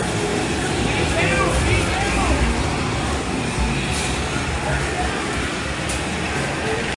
Ambiance from inside Jilly's Arcade on the boardwalk in Ocean City recorded with DS-40 and edited and Wavoaur.